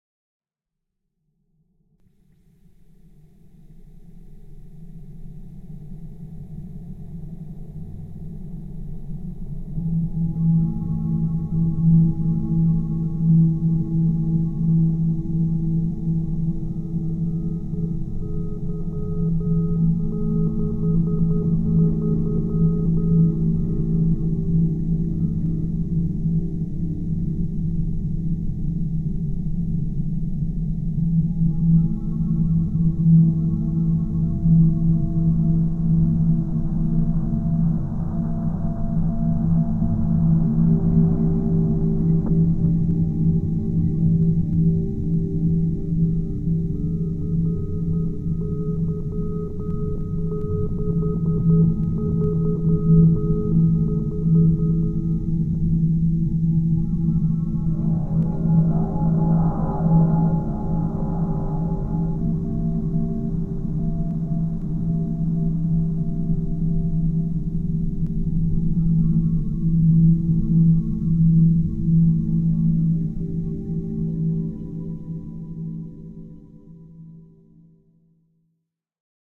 Lonely Probe (Still Searching)
Electric guitar and scanner Morse code.
sci-fi, dilation, code, electric, morse, space, outer, guitar, time